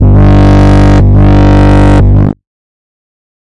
This bass hit is part of a mini pack